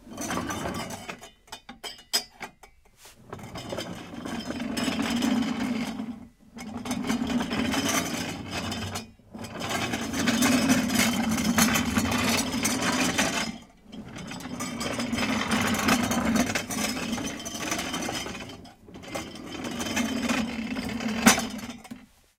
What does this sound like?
Sound: dinner wagon
Loc: studioH//Budapest
diner, hotel, dinner, wagon, restaurant